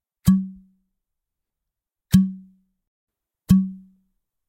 Suction sound of a corona beer bottle. Recorded with an NTG2 and H4N.